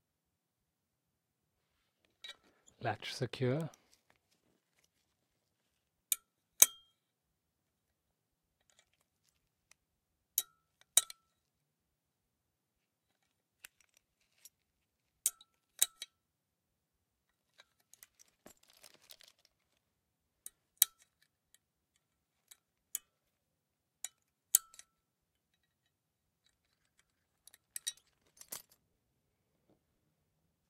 latch secure
latch clip foley
clip, foley, latch